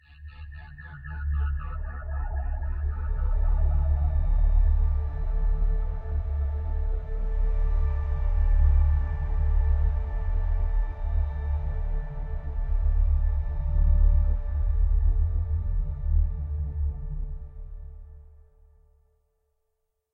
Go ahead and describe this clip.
A layered tone of notes in D made with Garage band.